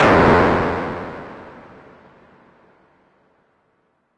This sample was created using a hopelessly artificial and dull synth perc sound and putting it through a VST Amp simulator with the overdrive setting cranked up and then applying a bit of reverb.